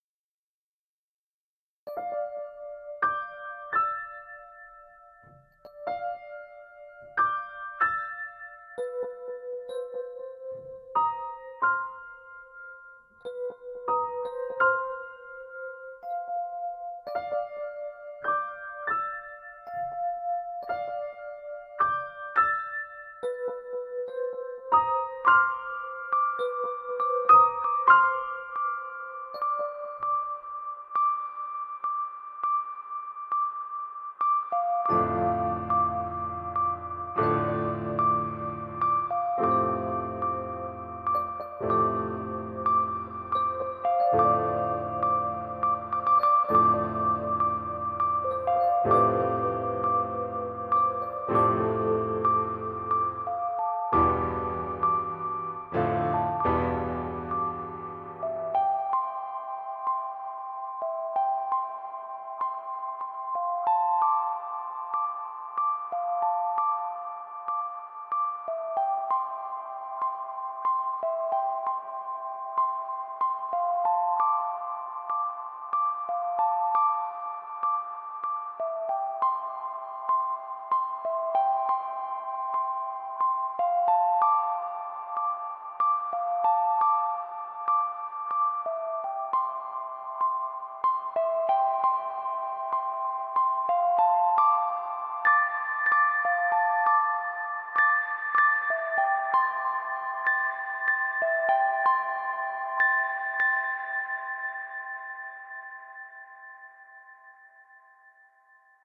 Ambient music created in Ableton.
ambient, background, chill, creepy, futurism, futuristic, intro, leading, melody, ost, out, piano, sad, sadness, silent, soundtrack, synth